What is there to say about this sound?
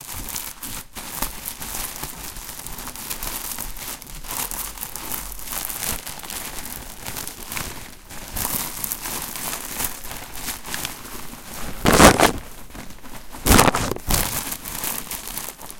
SonicSnap GPSUK bag texture
A nice texture of a plastic bag
scrunch, plastic, crumple